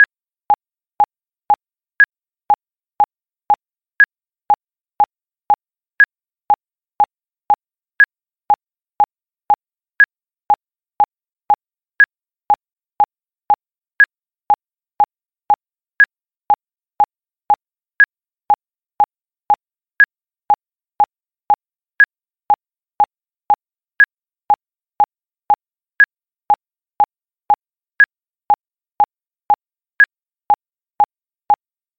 beeper click track
beep, blip, click